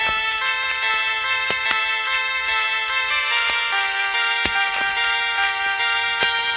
Vinyl Keyboard Loop 73 BPM
chill, music, sound, loop, samples, relaxing, melody, pack, packs, bpm, loops, piano, sample, 73, hiphop, Vinyl, pianos, lo-fi, nostalgic, lofi, jazz